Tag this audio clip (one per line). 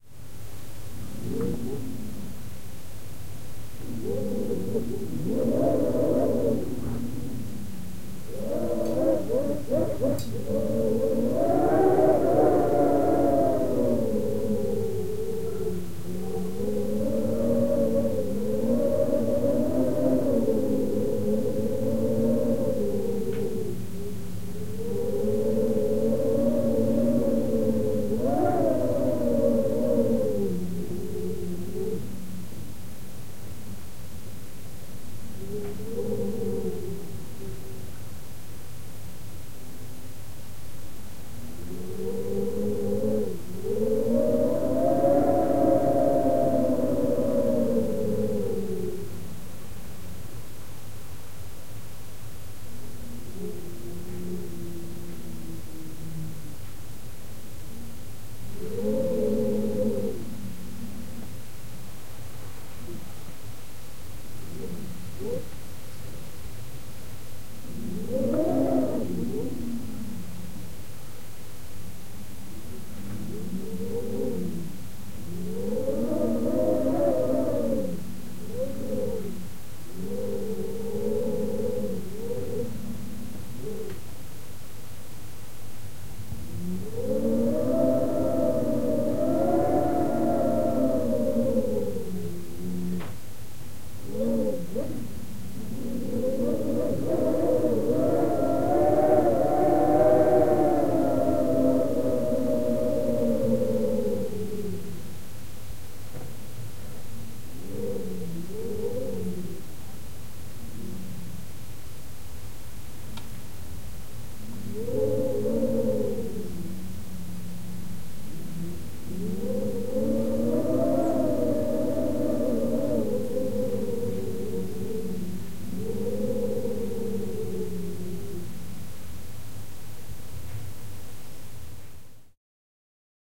cold
eerie
horror
howling
interior
piping
room
soft
weather
whistling
wind
winter